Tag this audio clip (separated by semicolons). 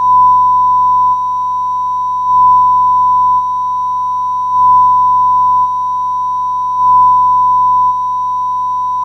analog; beep; bleep; cartoon; commnication; computing; effect; film; funny; fx; info; lab; movie; retro; sci-fi; scoring; signal; soundeffect; soundesign; soundtrack; space; spaceship; synth; synthesizer; synth-noise; vintage